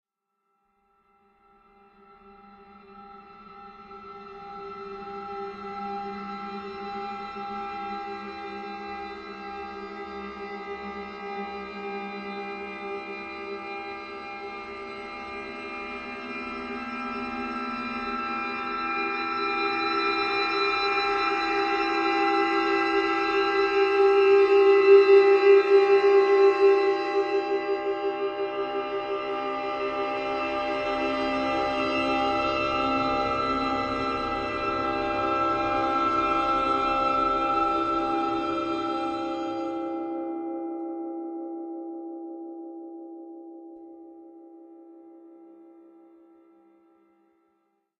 breath to sitar1
Just some examples of processed breaths form pack "whispers, breath, wind". Comb-filter patch in which a granular timestretched version of a breath is the 'noisy' exciter of the system (max/msp) resulting in a somewhat sitar-like sound.
eery, suspense, high, sitar, processed, drone, comb-filter, breath